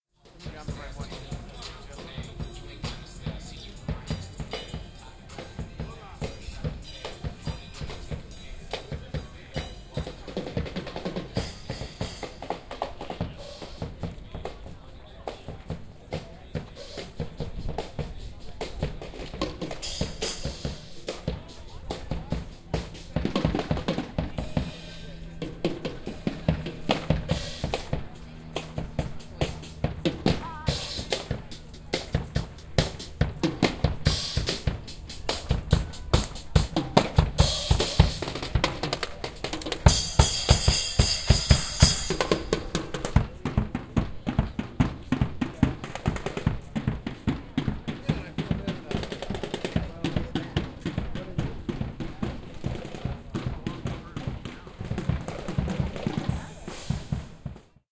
Drums Venice beach
Street drummer. Venice Beach, LA
Venice-beach, drummer